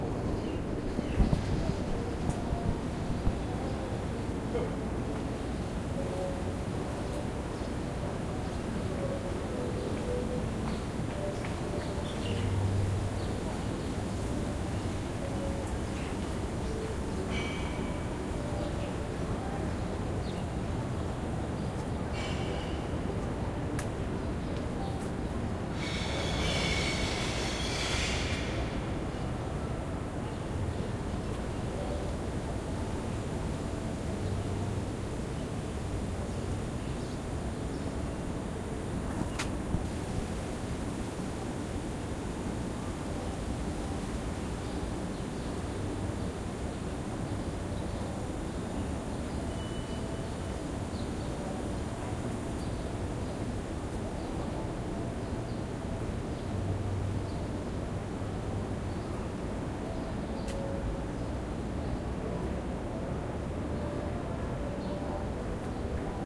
Recorded in the early evening hours just outside the back entrance where there are some gardens and a bit of open space. This sound sample has some bird sounds, some of the sound of palm trees rustling slightly in the wind. Because it is next to another hotel's restaurant, there is also the sound at one point of glass bottles breaking as they are put in the garbage.